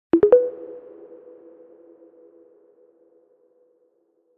soft-blip-E Major
A gentle positive sting.